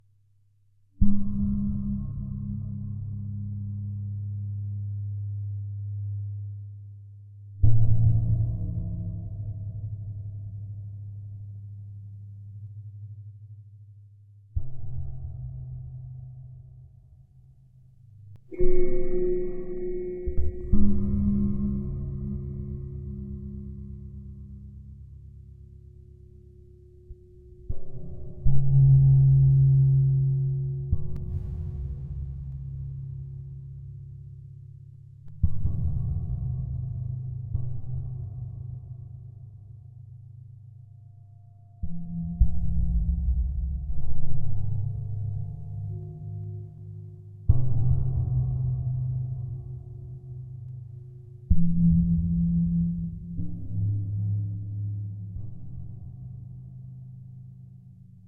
2023-01-07-dark-ambient-horror-ambience-001
creepy spooky haunted dark deep void black darkness
black, creepy, dark, darkness, deep, haunted, spooky, void